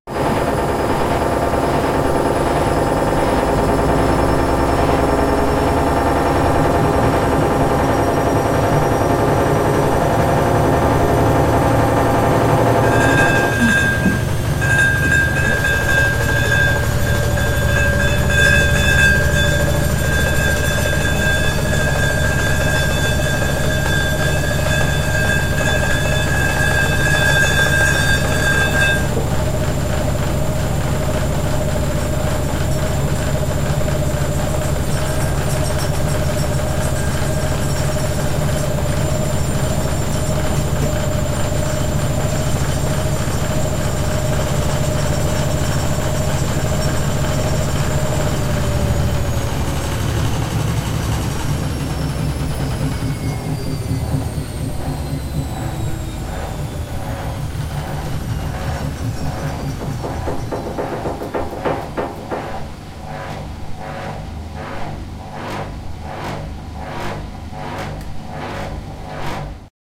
Just another washing machine. Sounds a little demolicious because I forgot a tablet with kitchen utensils on top. Recorded with Zoom H-4 (internal mics.) Recording distance app. 1 m. Edited in WaveLab, no reverb, litte eq.
noisy, kitchen-utensils, washing-machine